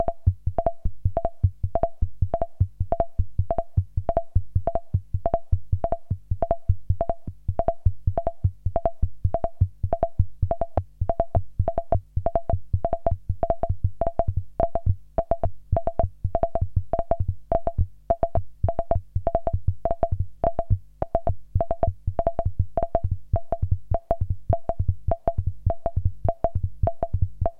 technosaurus rhythm shift 1
While I was playing around with some "extreme settings" on my Technosaurus Micron, this rhythmic structure came out. The bleeps are from the resonance of the 12 pole filter, driven by the LFO. Here, the LFO is driving also the oscillator pitch, resulting in a rhythm shift.
Recorded directly into Audacity through my Macbook internal soundcard.
technosaurus, bleep, microcon, analog-synth, rhythm, LFO